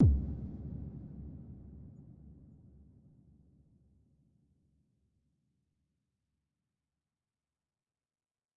Decent crisp reverbed club kick 3 of 11
3of11, bassdrum, club, kick